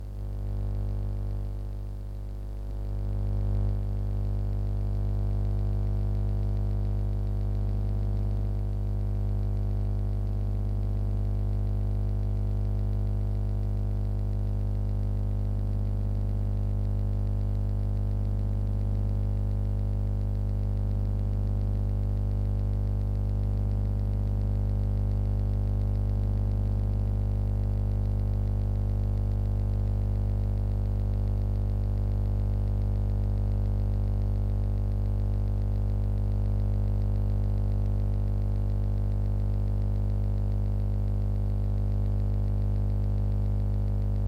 ELECBuzz Electric Hum Buzz 50Hz 01 FC HOME C411
Buzz, Hum, Electric, Static